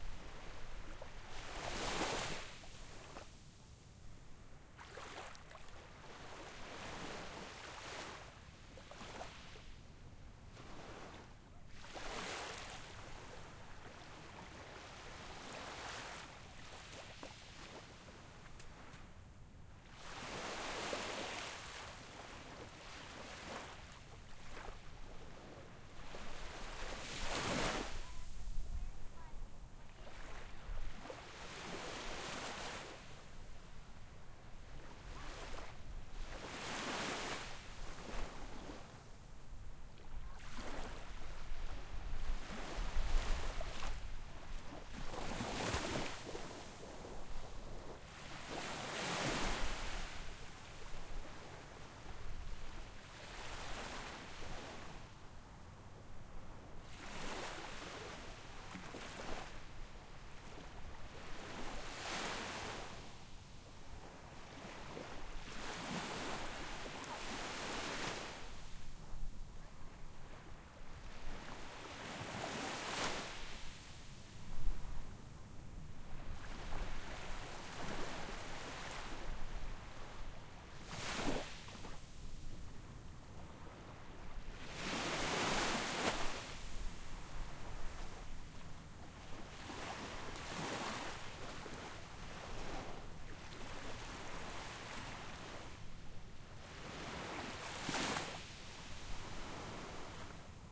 Gentle Waves - Quiet Beach
Recorded in Destin Florida
Sounds of a really nice and quiet beach. More focus on the small waves that wash up on shore.
nature, splash, quiet, seaside, water, bubbles, shore, relaxing, field-recording, chill, sea